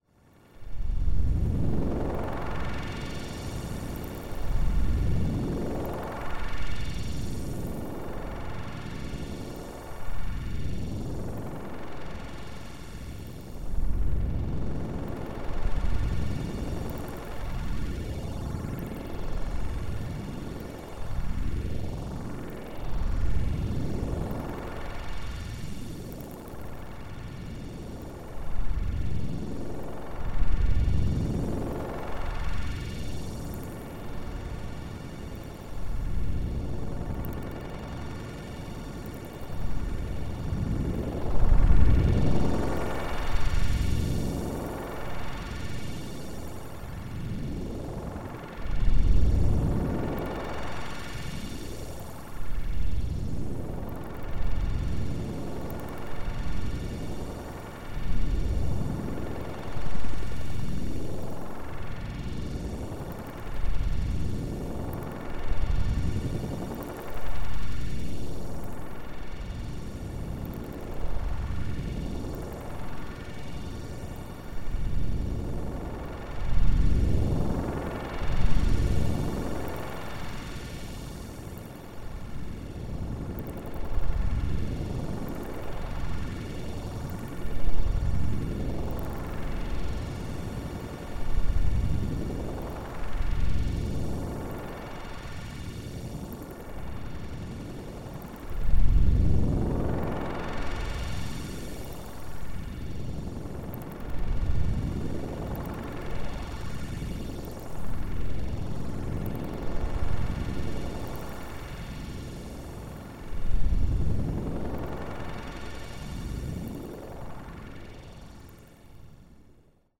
Noise Garden 25
1.This sample is part of the "Noise Garden" sample pack. 2 minutes of pure ambient droning noisescape. Strange weird noises and sweeps.
drone, effect, electronic, noise, reaktor, soundscape